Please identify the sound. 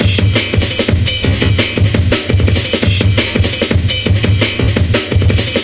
rotor pt1
drum-loop rework with vst slicex (pitch,effect,mastering) and soundforge 7 for edition of final sample
beat, beats, break, breakbeat, breakbeats, breaks, drum, drumbeat, drum-loop, drumloop, drums, jungle, junglebeat, loop, quantized, snare